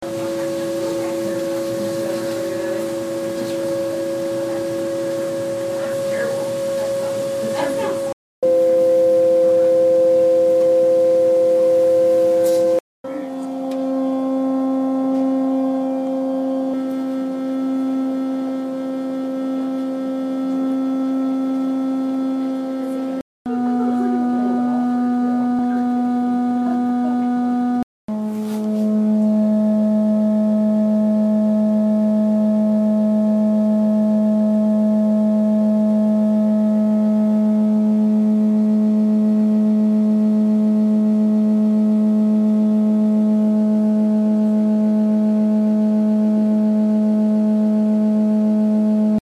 The beautiful sounds of office life.
This sound began with shorter, more lurching/lilting sounds (not in recording), which had the primal quality of ailed vocalizations. Before this recording was obtained, it leveled off into a constant droning--but not before it was christened "Chewbacca."
A lovely sound indeed to start on a Friday afternoon, with neither warning nor explanation, only to stop an hour later, equally unheralded. Then start again--until at our urgent entreaties, Management appeared to fix the problem.
Apparently it was another incident related to the HVAC system. TGIF.